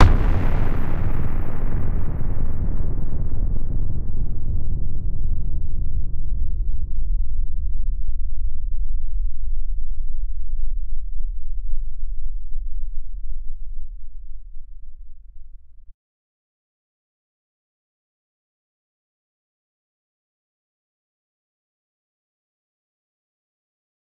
A huge bomb dropping on it's designated target. Created with a the kicks in the Trance / techno kit on Korg M1 from the DLC, TriDirt, and FL Blood dist. Compressed with MDE-X.
atom, bomb, explosion, nuke